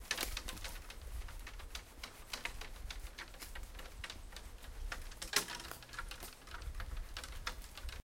A branch being shuffled, cracked and crackled